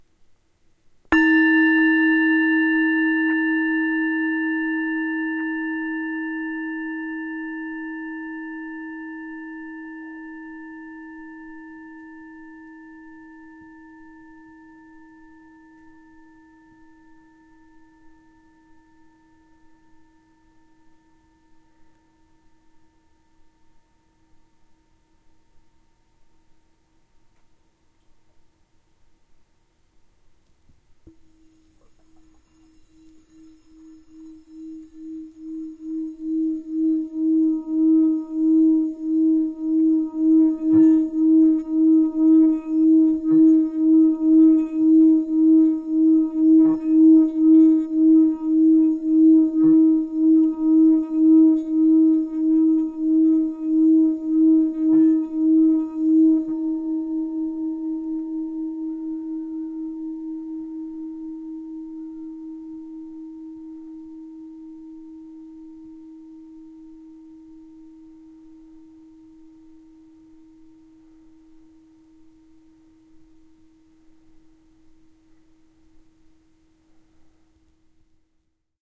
Pentatonic Bowl#1
Pack Contains:
Two 'drones' on a 11 inch diameter etched G2 pitch Himalayan bowl; a shorter drone on the bass and a longer drone with both bass and first overtone. Droning done by myself in my home.
Also contains pitch samples of a 5 bowl pentatonic scale singing bowl set of old 'cup' thado bowls, assembled by myself. Each sample contains both a struck note and a droned note. Some bowls have more than one sample for no particular reason. All performed by myself.